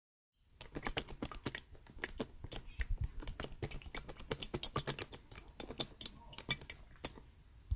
A sloshing, sloppy sound made by shaking melted butter inside a tupperware container.